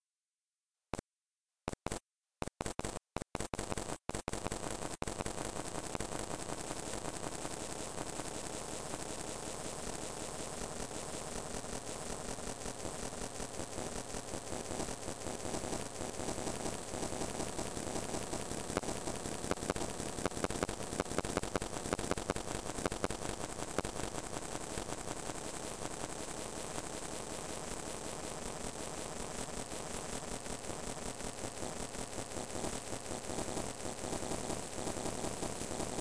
Crackling and clicking drone... All sounds were synthesized from scratch.